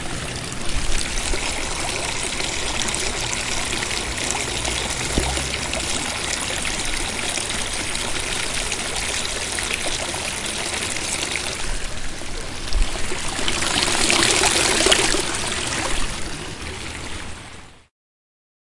Fountain in Rome in summer.
Recorded with Zoom H4n
2011